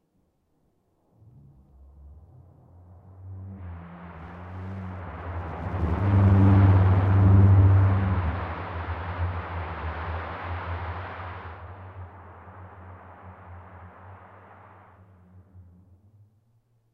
KELSOT10 hydrophone 1st try
Booming sound created via an avalanche on Kelso Dunes recorded on hydrophone buried in dune.
usa, california, sand